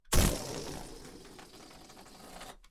Balloon Deflate Short 7
Recorded as part of a collection of sounds created by manipulating a balloon.
Balloon, Deflate, Fart, Flap